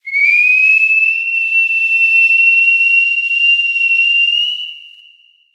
calling whistle
Japan, film, movie, whistle, Japanese, samurai, old, calling, ancient